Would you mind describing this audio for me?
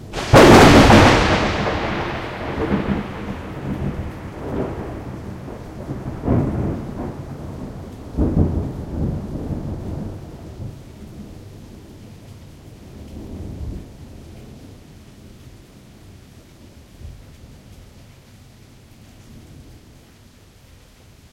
Thunder Loud
Loud thunder clap. Summer storm. Midwest, USA. Zoom H4n, Rycote Windjammer
Storm, Thunder, Thunderstorm, Weather